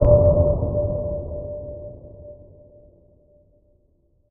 Sth. Hit by heavy hammer
deep, echoing, Heavy-hammer